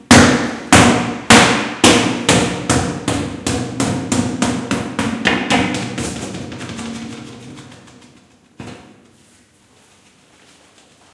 large fitball bouncing along hallway. Audiotechnica BP4025 into Sound Devices Mixpre-3.